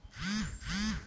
Smartphone vibration (incoming message)